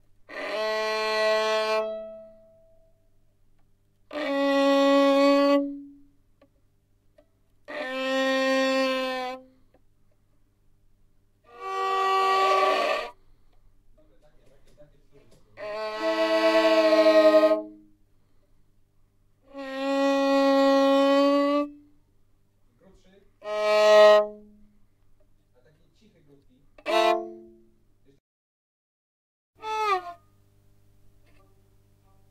violin-false-sounds
9 sounds of bad played violin with noise and false. Recorded on SP B1 microphone. No post-processing.
noise, violin, fiddle, false, string, strings